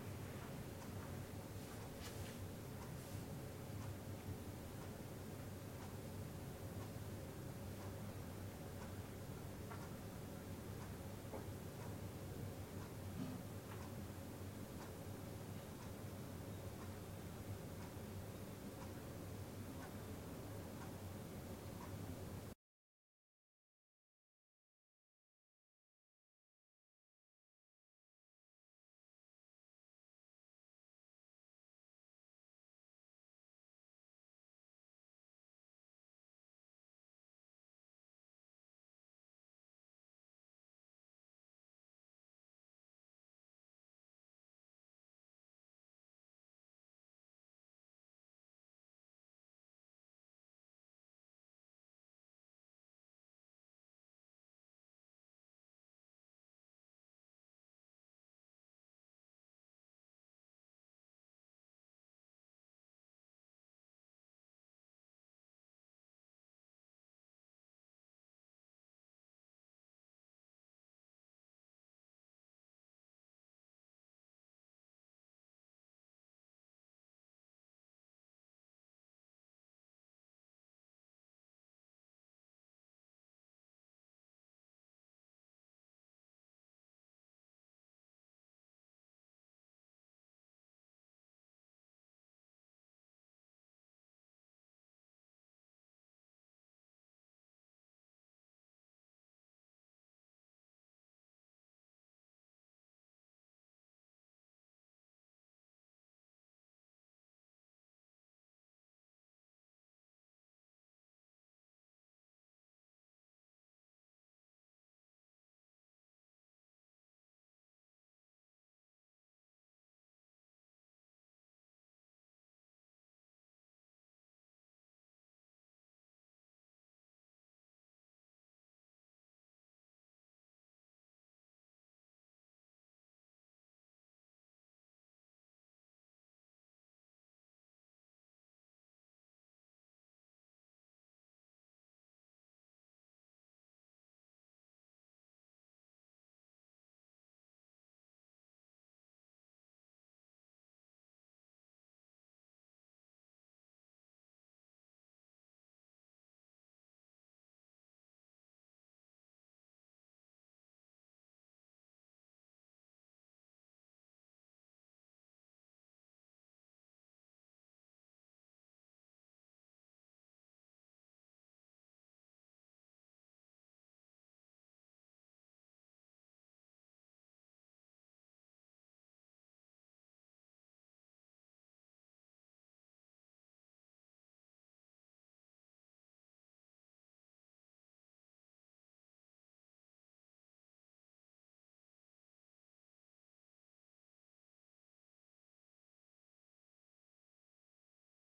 Roomtone House Ticking
in-house room tone ticking
tick, tock